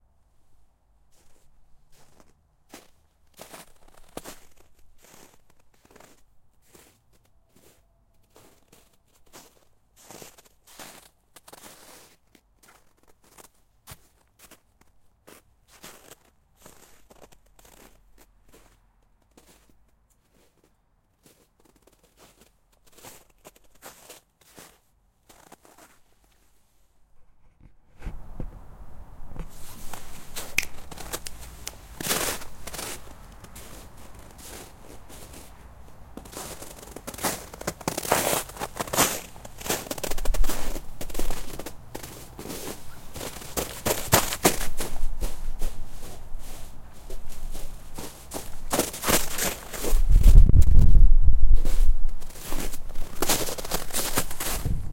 Walking snow
walking, snow, walk, foot